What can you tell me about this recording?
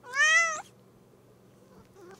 Cat Meow 3
Recording of my cat meowing. Recorded on Tascam HDP2
meow, pet, animal, cat